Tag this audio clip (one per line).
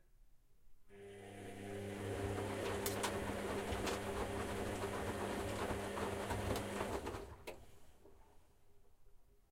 housework
cleaning
house